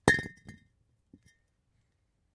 concrete block 2
Concrete blocks knocked together.
Recorded with AKG condenser microphone to M-Audio Delta AP soundcard
concrete-block, effect, hit, stone, strike